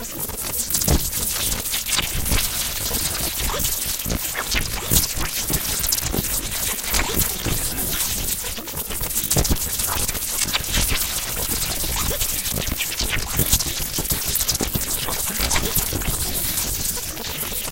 Light Electricity crackling
Another electricity crackling sound. Inspired again from Naruto anime and the sound of chidori.
Made by mouth :D
chidori, crackling, electric, electrical, lightning, sparks